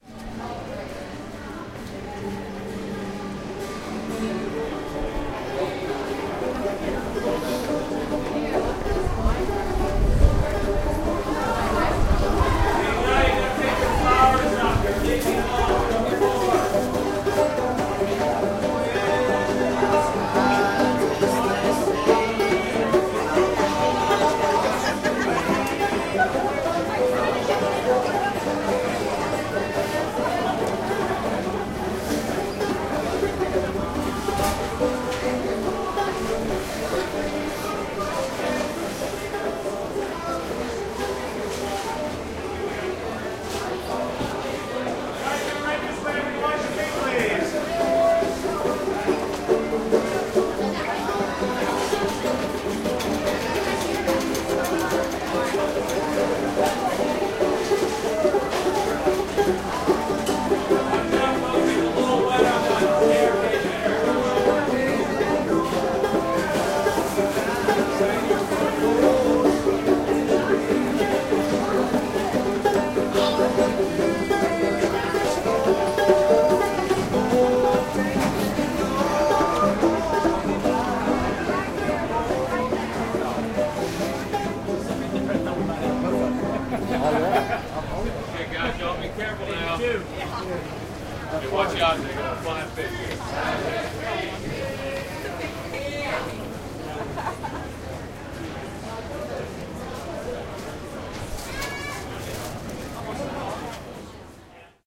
Seattle Public Market Center
Field recording at the Seattle's Public Market Center.
blue, street, music, Seattle